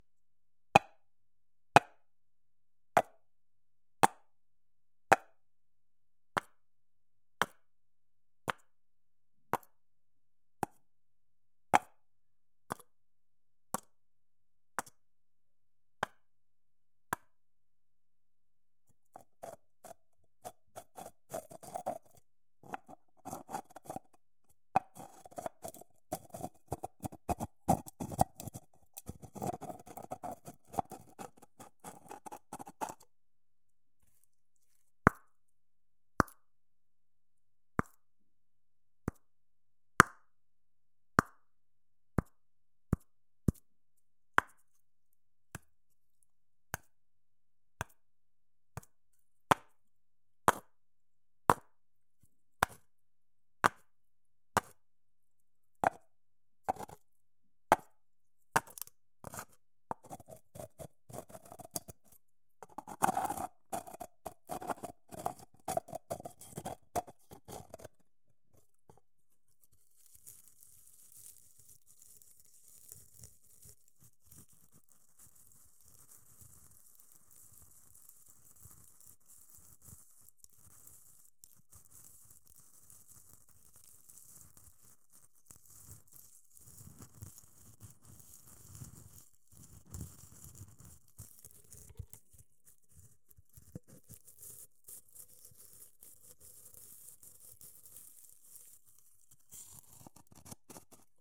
coconut sounds
Different sounds of a coconut.
Recorder: Zoom H4n (stereo)(no post processing)
click, clicks, coconut, hair, horse, rub, sfx